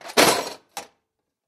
Tool-case - Close
Tool-case closed, handles touch the case.
close, 80bpm, one-shot, metalwork, 2beat